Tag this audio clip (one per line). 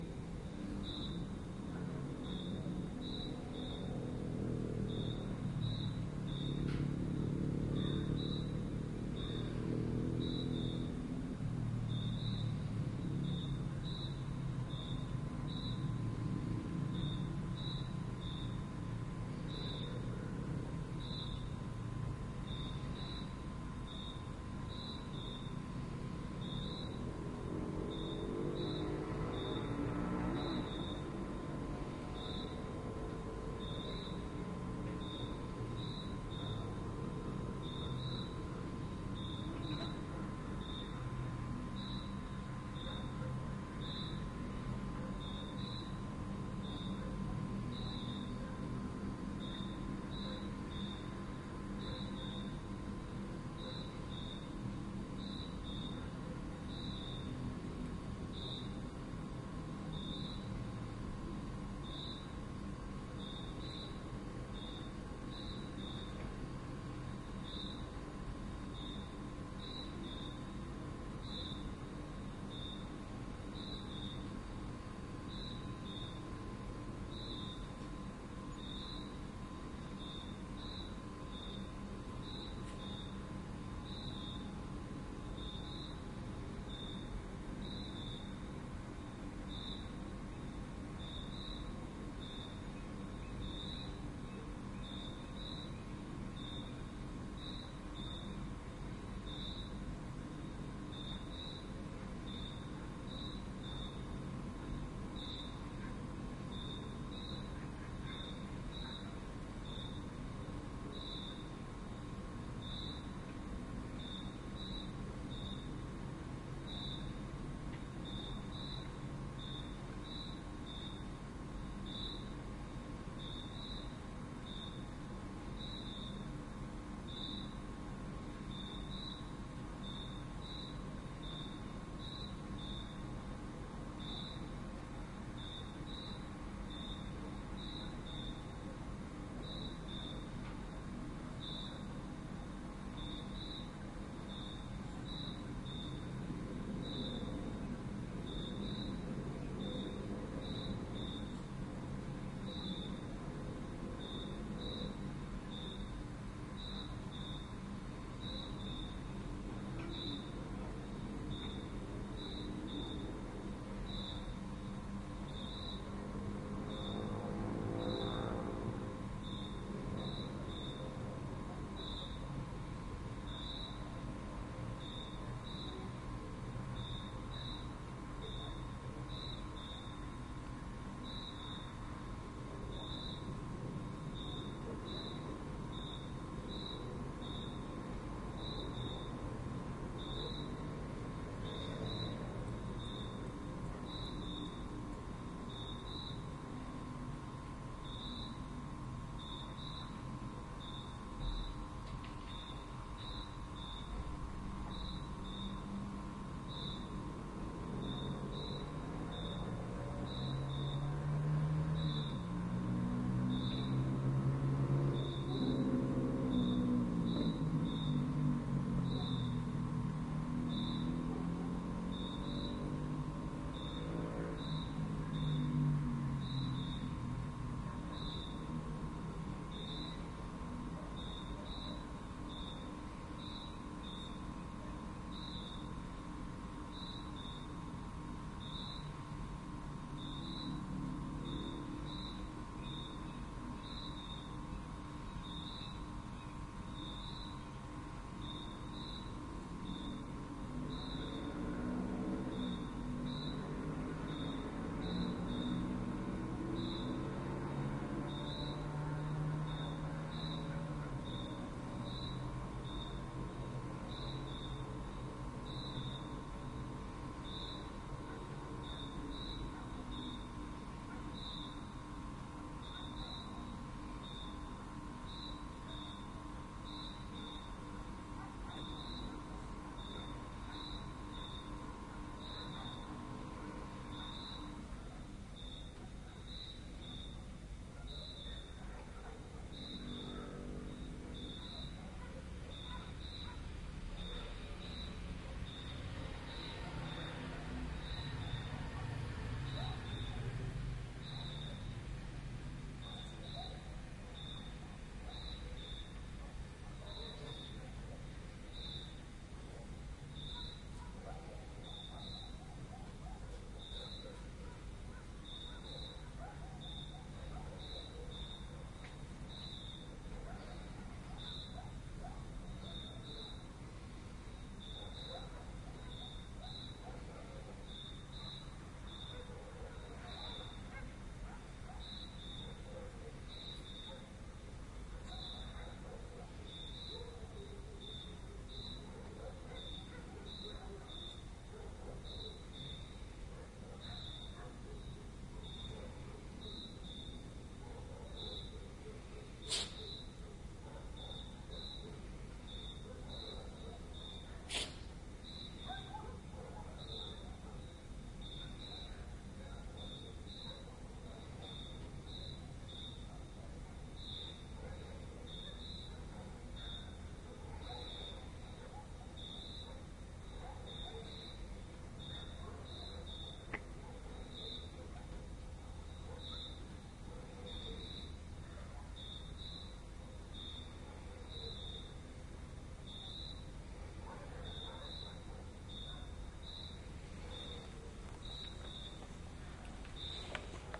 fr2-le
soundman
village
ambiente
fostex
stereo
campo
okm
argentina
noche
ambient
night
rafaela
dog